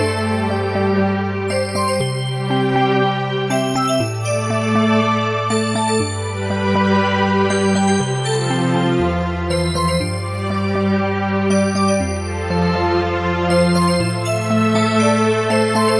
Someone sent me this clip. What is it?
short loops 16 02 2015 II 6
made in ableton live 9 lite
- vst plugins : Alchemy
- midi instrument ; novation launchkey 49 midi keyboard
you may also alter/reverse/adjust whatever in any editor
please leave the tag intact
gameloop game music loop games techno house sound melody tune
game, gameloop, games, house, loop, melody, music, sound, techno, tune